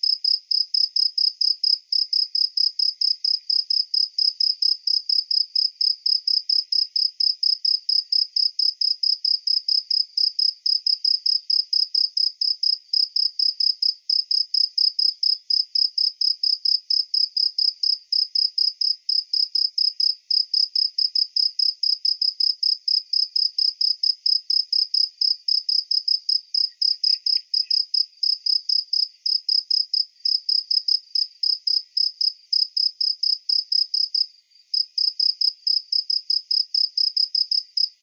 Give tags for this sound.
sunset; beach

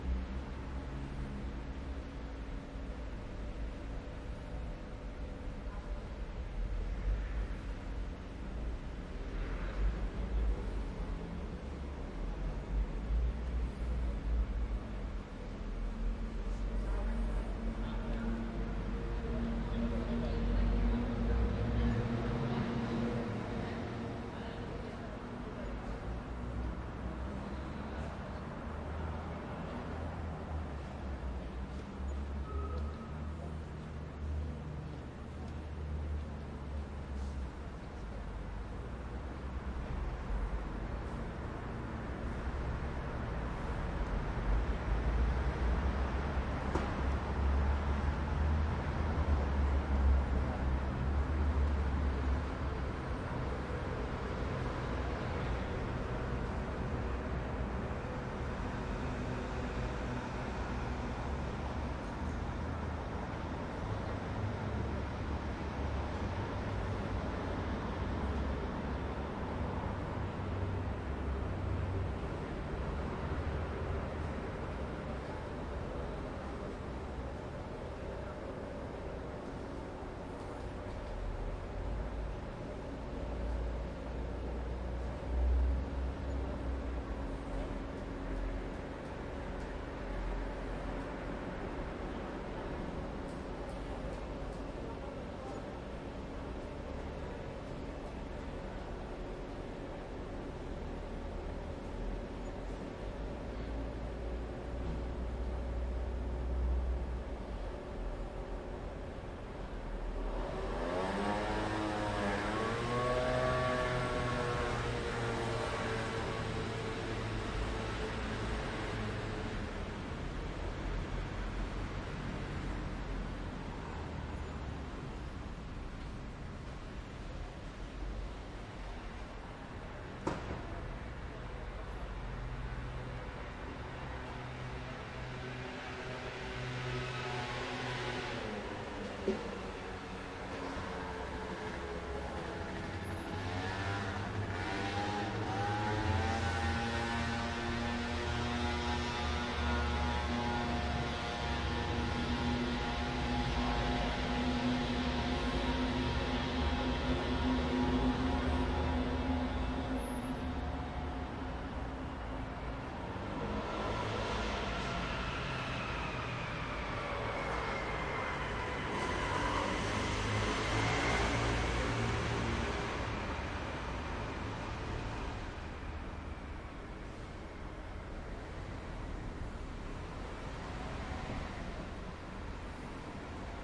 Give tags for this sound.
Ambience; Night; Outdoor; PlMiquelCasablanques; SantAndreu

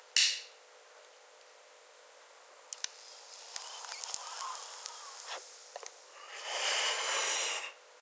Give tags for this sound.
herbs; inhale; lighter; smoke; smoking

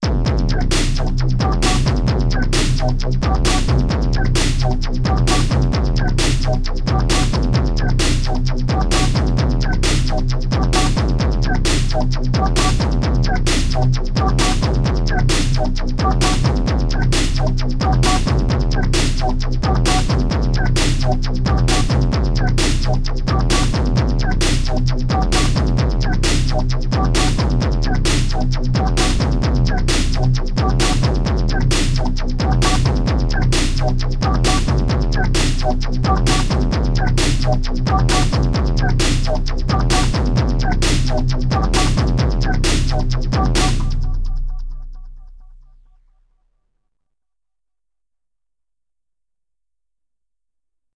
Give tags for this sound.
loberg; loop

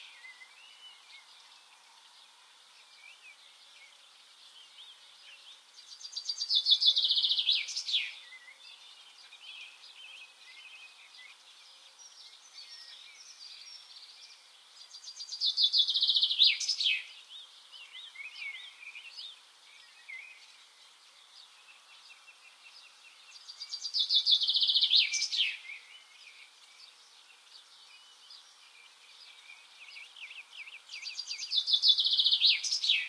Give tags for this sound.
birdsong; bird; field-recording

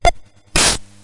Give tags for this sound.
80s; casio; drumloop; loop; march; pt1; retro

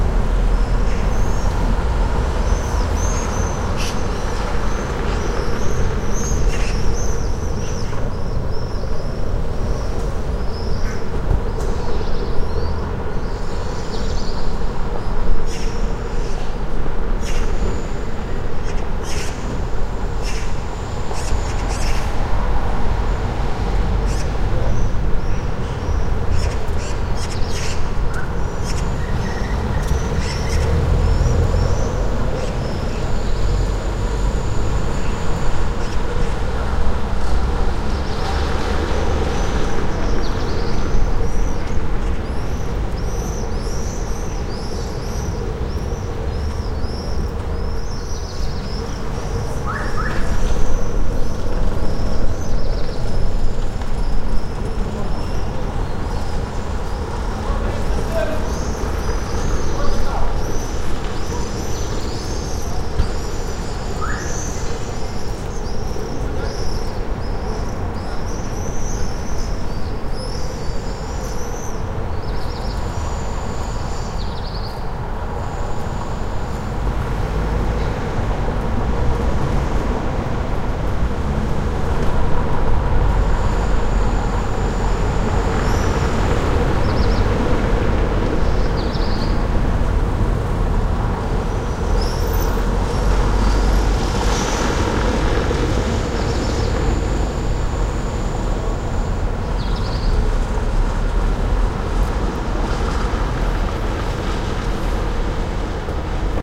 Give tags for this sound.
ambience; ambient; atmosphere; auto; birds; center; city; day; downtown; field-recording; from; general-noise; Lviv; many; noise; outdoor; passers; passersby; people; soundscape; street; summer; town; traffic; Ukraine; window; yard

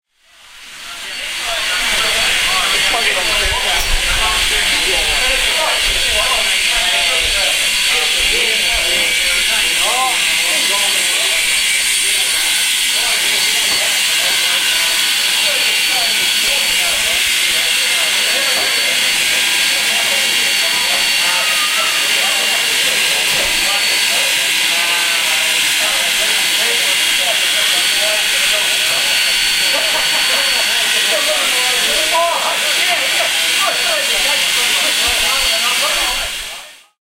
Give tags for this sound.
new-zealand; steam-train

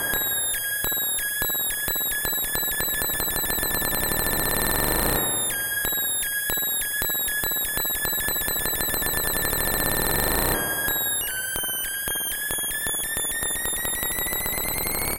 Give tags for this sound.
Analog-Noise; Analog-Synth; Modular-Synth; Spring-Reverb

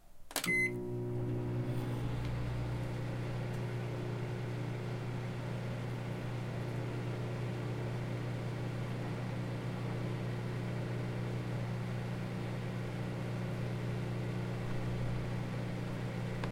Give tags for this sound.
microwave; contact-microphone; pop-corn

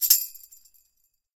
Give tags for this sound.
chime; drums; tambour; sticks; hand; chimes; Tambourine; percussive; orchestral; percussion; rhythm; drum

